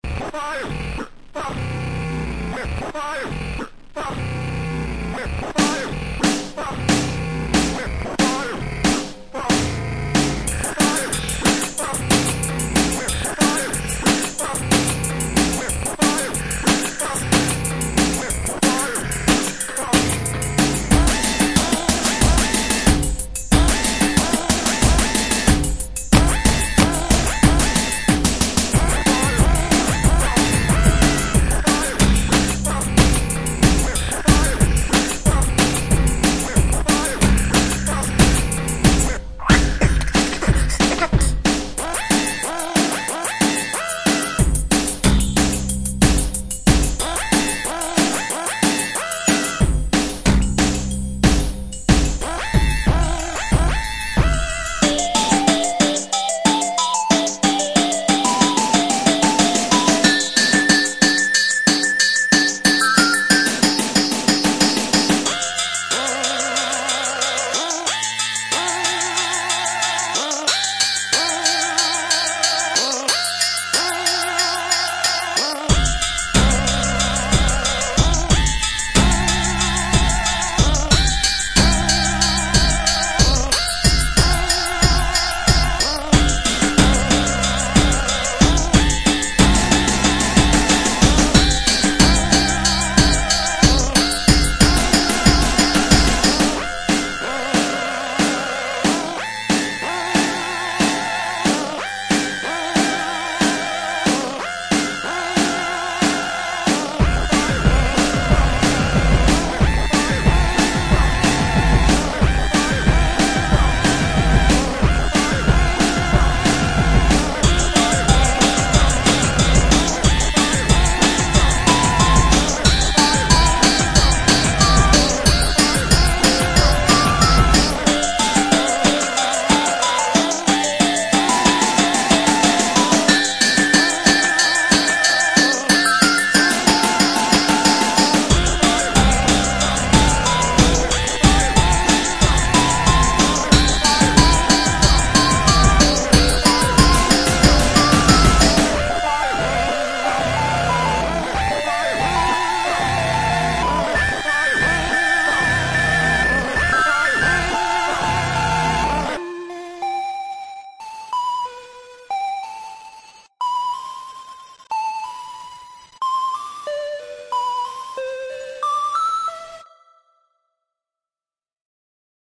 this shit is a lil industrial and there aint much space left in it but i dare you to low-pass ur voice and it'll sit